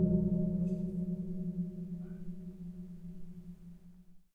efan grill - airy metallic hit
An electric fan as a percussion instrument. Hitting and scraping the metal grills of an electric fan makes nice sounds.